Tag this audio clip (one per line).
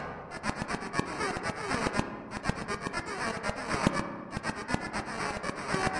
120bpm
delay
echo
loop
loopable
rhythmic
seamless-loop
strange
synthesized
synthetic